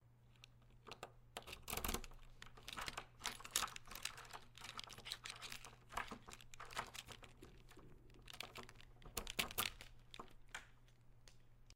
Unlocking Door - This is the sound of a door being unlocked.
unlock, door